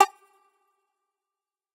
Tweaked percussion and cymbal sounds combined with synths and effects.
Oneshot, Percussion, Short